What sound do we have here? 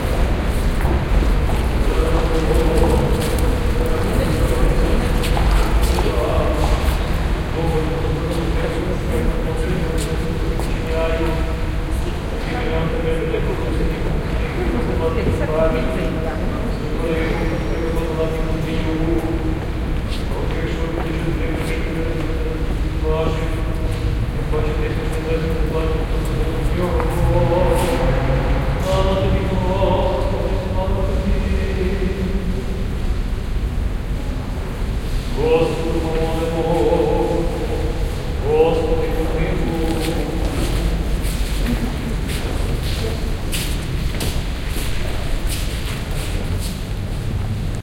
08-kiev-church-priest-blessing
Inside an orthodox church in kiev, we can hear hushed voices and a priest blessing people.
blessing, church, field-recording, kiev, orthodox, priest